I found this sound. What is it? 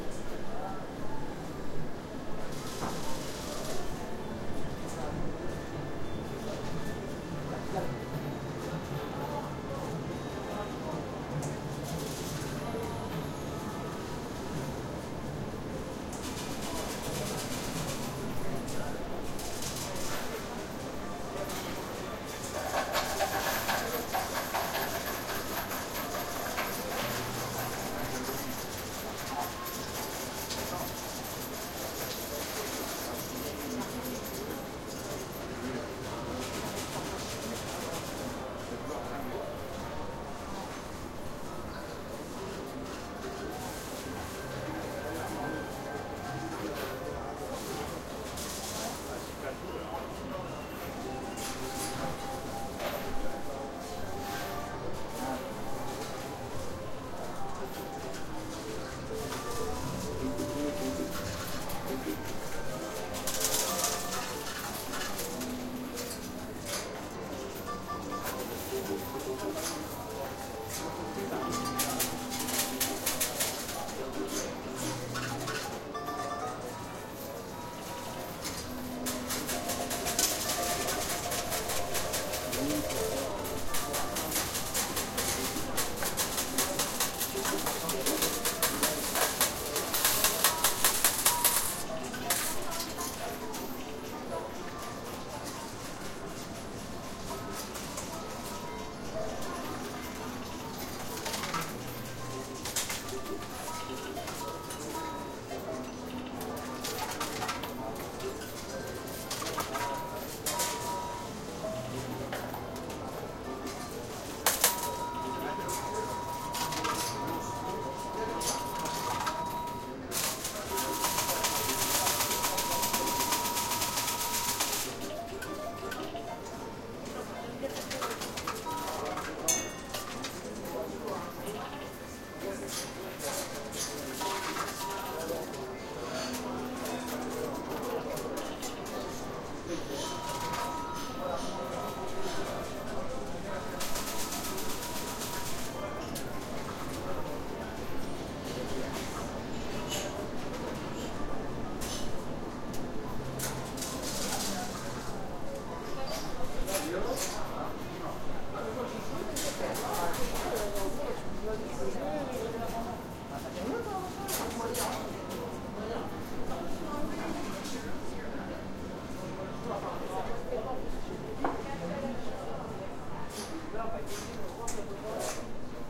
Casino ambiance, Dieppe, France, some discernible english and french 01
Casino ambience, Dieppe, France, some discernible English and French.